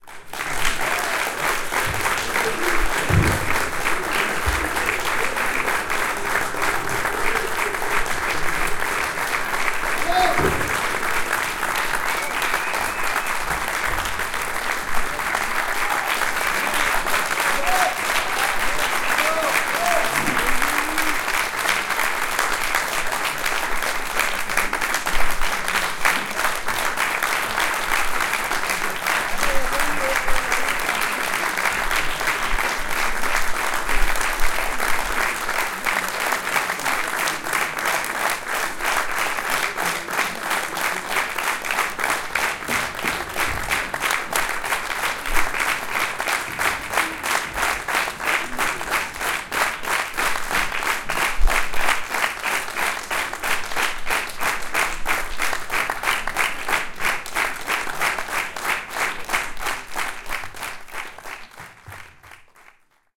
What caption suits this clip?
Sound of applause at the end of a concert. Sound recorded with a ZOOM H4N Pro.
Son d’applaudissements à la fin d’un concert. Son enregistré avec un ZOOM H4N Pro.